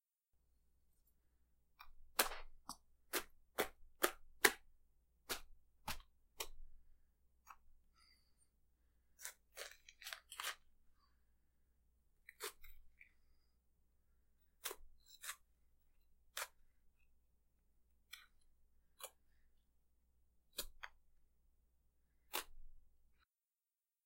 The sound of a person receiving multiple small stab wounds. Recorded on MAONO AU-A04TC; created by stabbing the rind and flesh of a large, ripe jackfruit (often used as a meat substitute in vegan dishes for its fleshy texture).